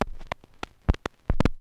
Short clicks and pops recorded from a single LP record. I carved into the surface of the record with my keys and then recorded the sound of the needle hitting the scratches.